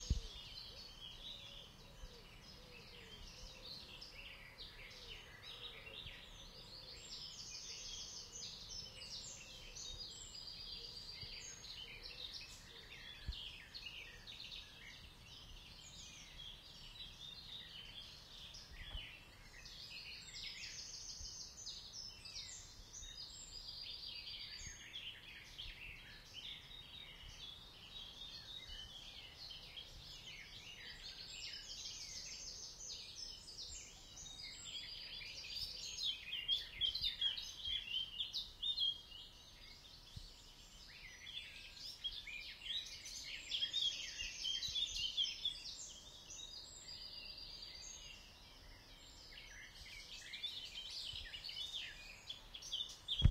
Morning birds 1
Field recording of birds singing in early morning in a small forest.
Recorded wirh Zoom H1 near the city Leeuwarden (Netherlands)
bird, field-recording, morning